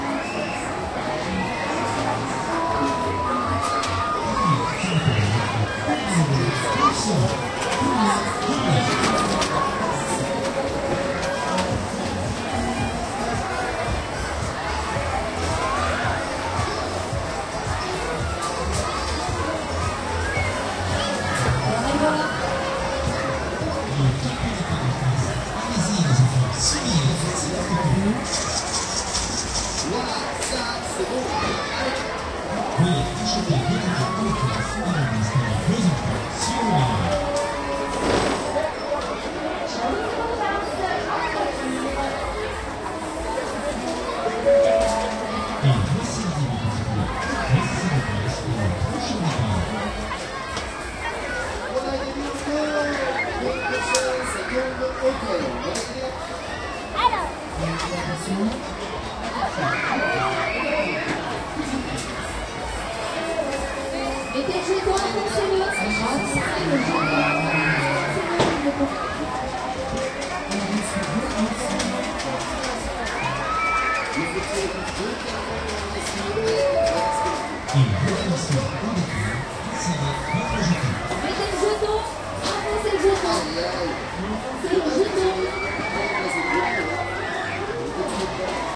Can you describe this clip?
funfair France ambiance
A large fun fair in Lyon France. In the middle of several attractions for kids. Noises, barker, people screaming in the background. Stereo. Recorded with a Marantz PMD 660, internal mic.